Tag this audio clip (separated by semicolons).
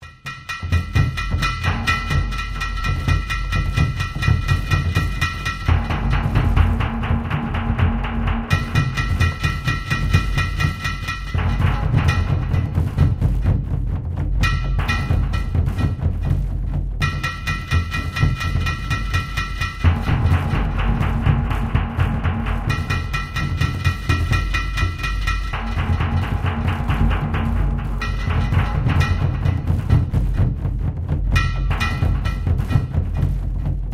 rhythm; beat; percussion; percussion-loop; synth; percussive; improvised; war; drum-loop; ethnic; drums; synthesizer